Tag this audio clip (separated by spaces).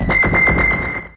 multisample one-shot synth